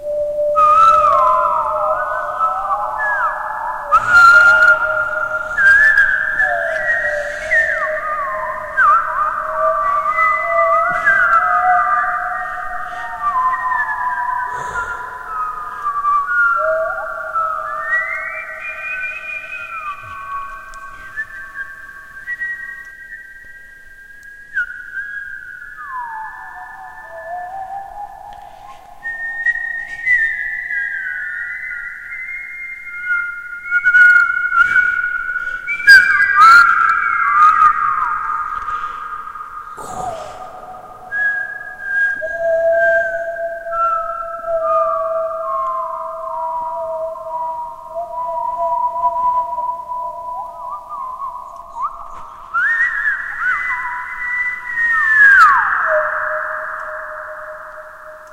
spooky whistling
Different human whistling layers overlapping each other with an echo effect.